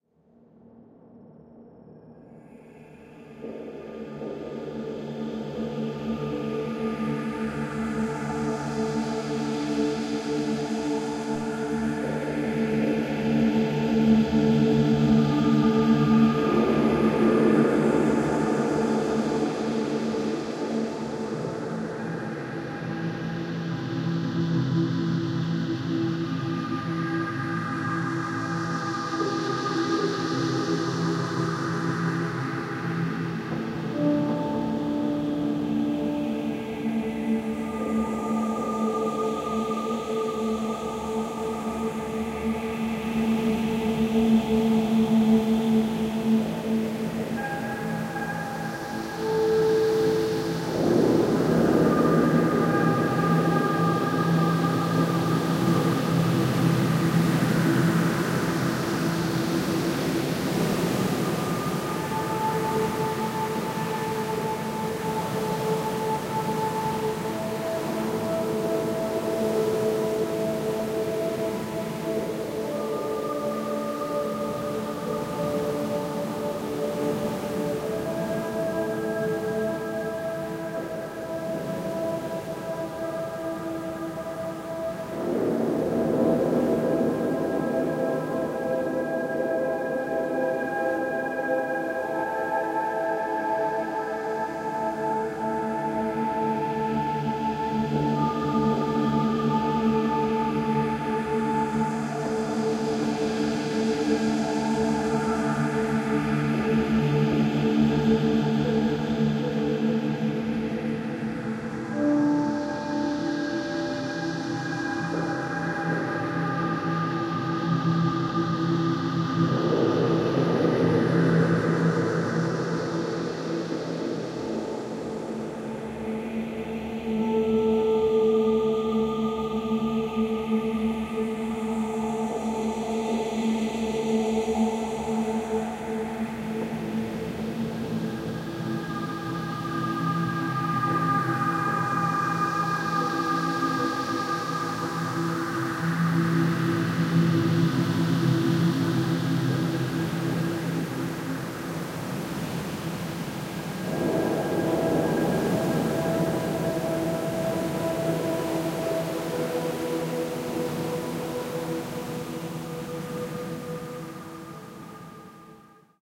meditation pad
a nice padsound with lots of relaxing ingridients.
pad, relaxation